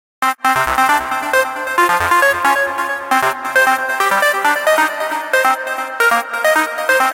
Changestimate mahdev 12 135bpm
Acid Loop for you from my song "Changestimate"